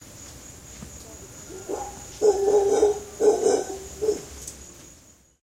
Howler Monkey call on the Yucatan Peninsula
This is the sound of a male Howler monkey calling to other monkey's in the jungle of Mexico's Yucatan Peninsula
Yucatan-Peninsula, Howler-monkey, Yucatan, Mexico, monkey